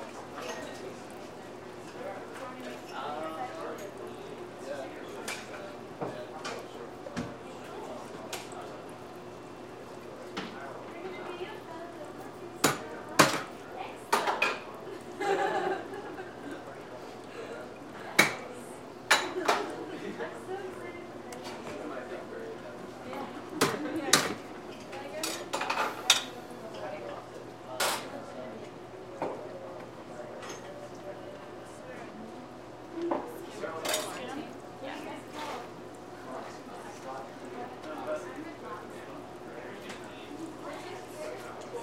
making drink 1
This is a recording of a barista preparing a coffee drink at the Folsom St. Coffee Co. in Boulder, Colorado.